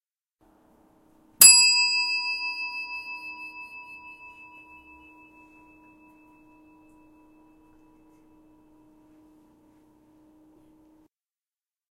ClassBell Regenboog Sint-Jans-Molenbeek Belgium
Sound of the class bell form 'De Regenboog' school in Sint-Jans-Molenbeek, Brussels, Belgium